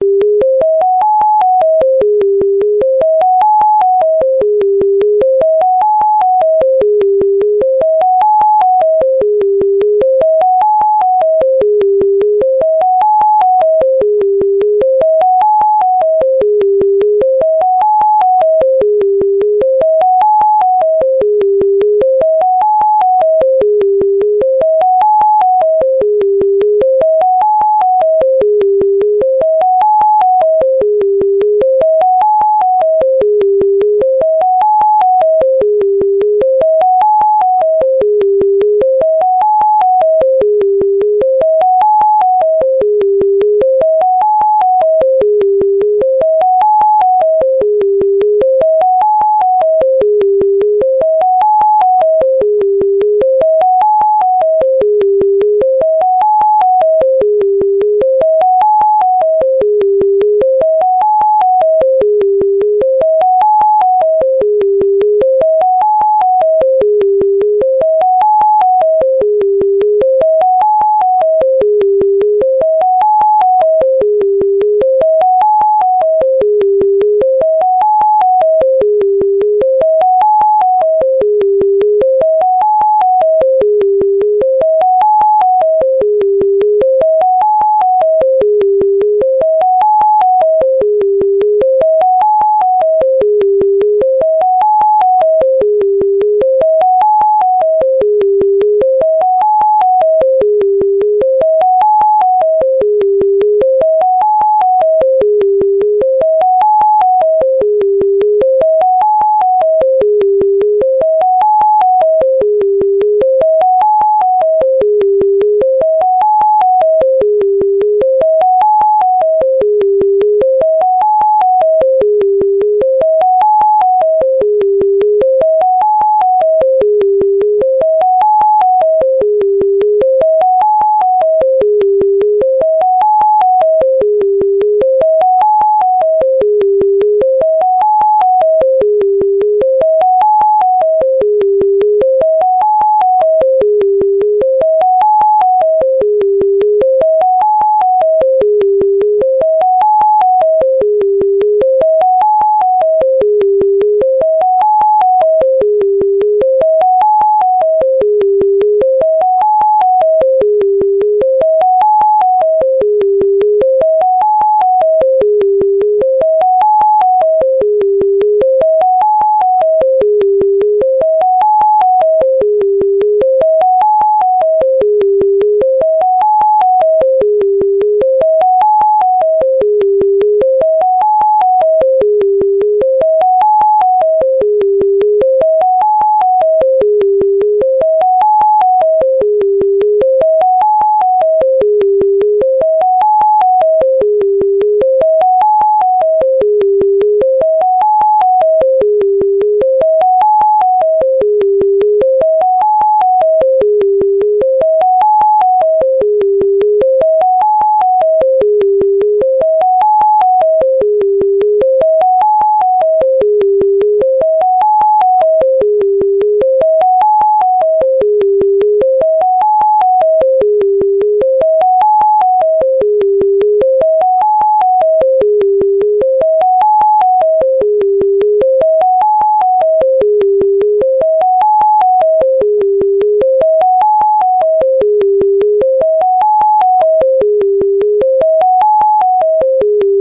Created using Audacity
200ms intervals